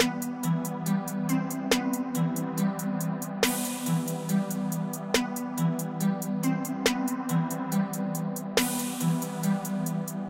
Hip-Hop Loop {Mystery Loop}
Kept it simple! Just a snare, hat, and crash over a nice bell sound. Thanks for listening, hope you liked it! Created - Fl Studio, Nexus, and DoubleBeats Trap Kit.
12/13/14
New, Production, Hip-Hop, Simple, Suspense, Sound, Hats, Deep, 2014, Cinematic, Ambient, Trap, Bass, Recording, Snare, Film, Public, Gangster, Mysterious, Free, Movie